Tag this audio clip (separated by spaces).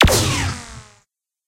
sci-fi weapons lazer